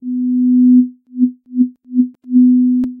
DAllios ouvertureVariée pulsation vermeture varié
opening Variation pulsation closing variation
250hz, sinus, waves